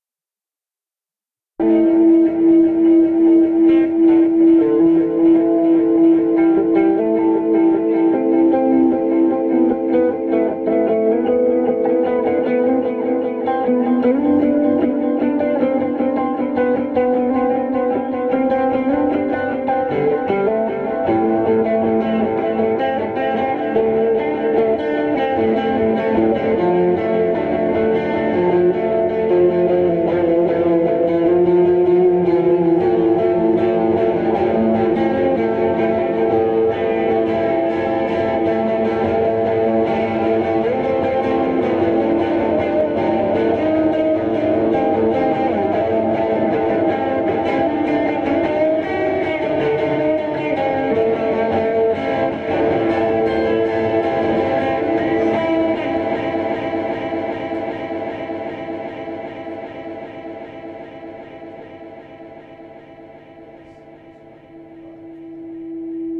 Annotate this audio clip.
a hofner played through a Laney tube head, marshall stack, delay and slight gain